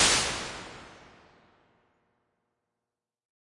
Impulse response of a 1986 Alesis Microverb on the Large 5 setting.